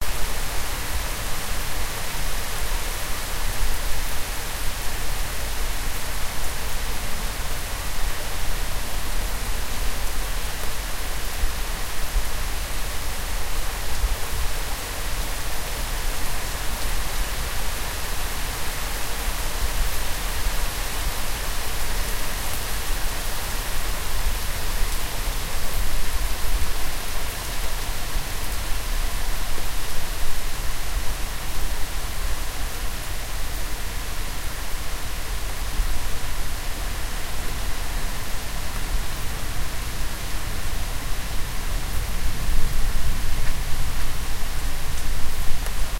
distant rain
Distant, strong rain recorded several meters above the ground with a Zoom H1 XY-microphone.
But you don't have to.
Wanna see my works?
strong, strong-rain, distant, extreme, heavy-rain